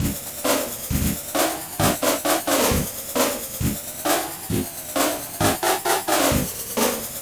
Catchy beat with an awesome end